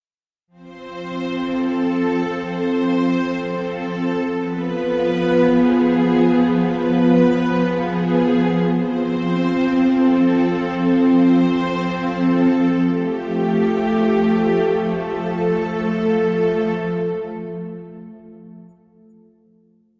made with vst instruments